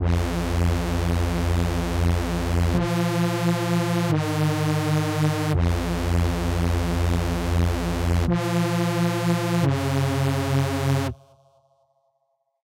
dnb reese
Traditional Drum&Bass (dnb) reese synth made in Absynth 4